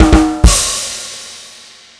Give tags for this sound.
bad; drums; joke

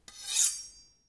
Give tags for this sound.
metallic; metal; slide; friction

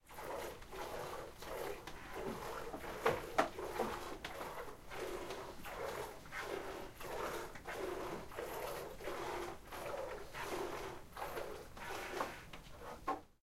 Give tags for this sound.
bucket; ch; full; Goat; lait; milk; Milking; plastic; plastique; plein; seau; traire; traite; vre

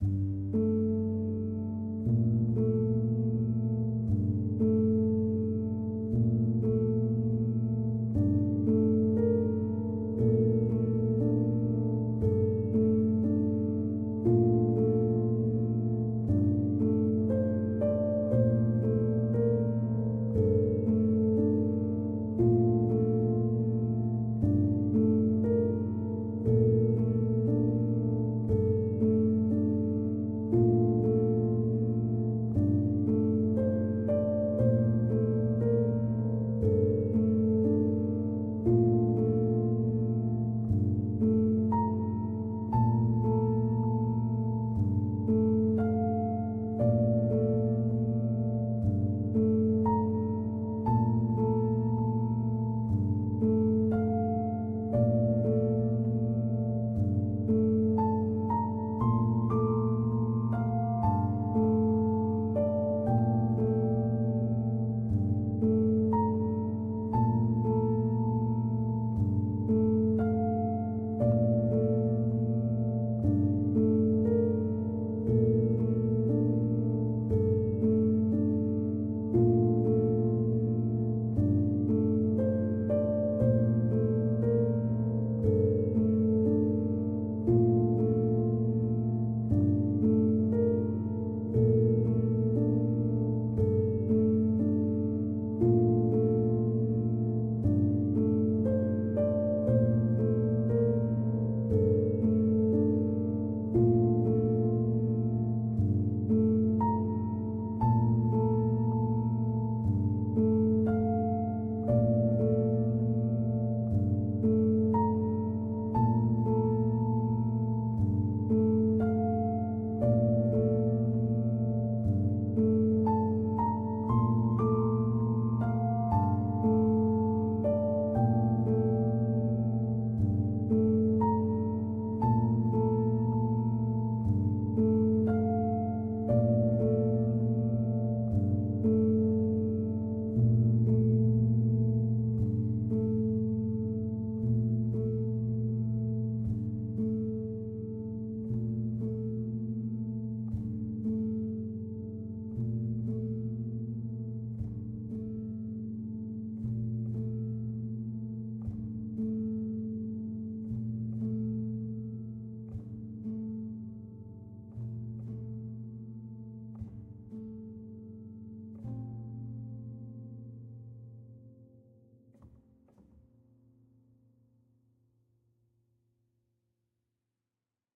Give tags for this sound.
dramatic; grand; pedal; steinway; classic; piano; sad

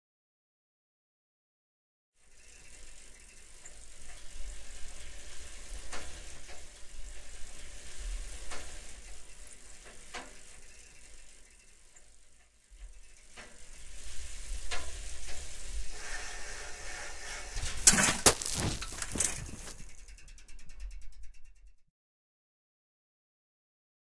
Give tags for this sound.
bbicyccle; jump; rider; wheel

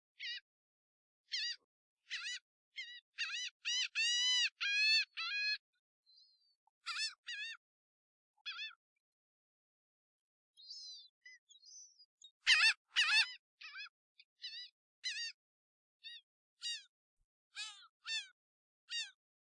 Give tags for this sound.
beach; bird; birds; field-recording; Gulls; nature; ocean; Seagulls; water

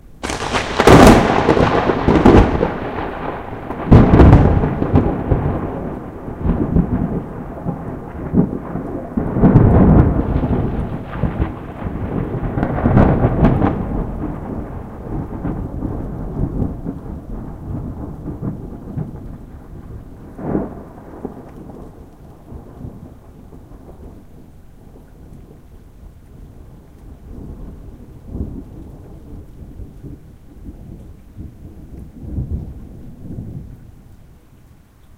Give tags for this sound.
field-recording; lightning; storm; thunder; thunder-clap; thunder-roll; weather

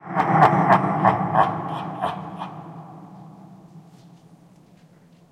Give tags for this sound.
laughter; basement; cave; creepy; underground; reverb; cavity; echo; field-recording; dungeon

